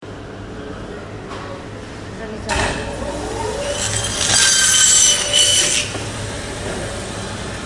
Electric butcher bone saw
An electric butcher's bone saw cutting through some meat and bones.